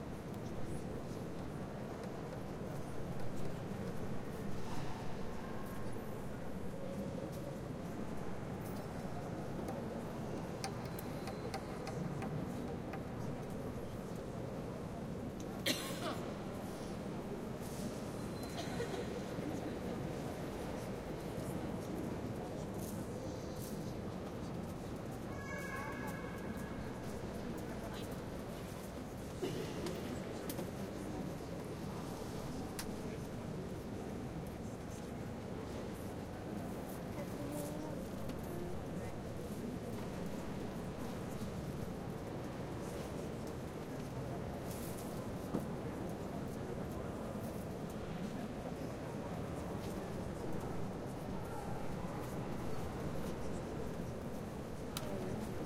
Barcelona Cathedral del Mar indoor ambiance
ambient, atmosphere, background, Barcelona, cathedral, field-recording, people, soundscape